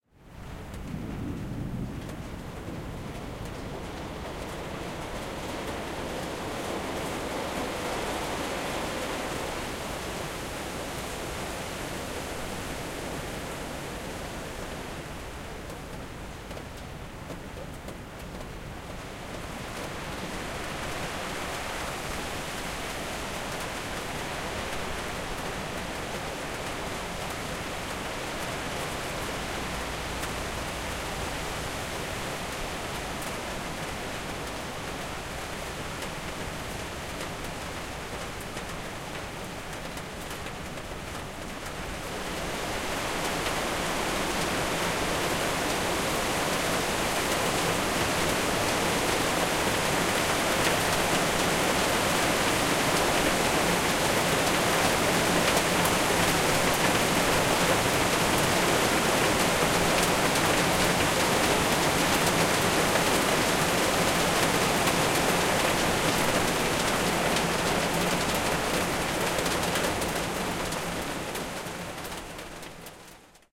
Heavy Rain - Metal Roof
Heavy rain on a metal roof.
rain, weather